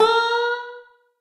lil bender
Funny banjo pluck bending upward